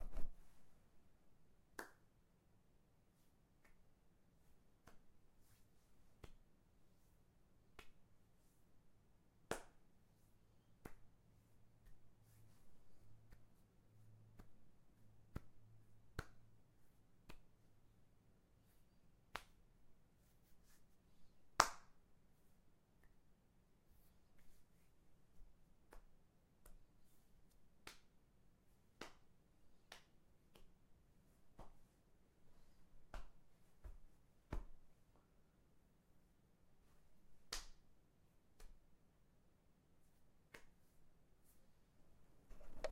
Hand Touching
Various sounds of hands touching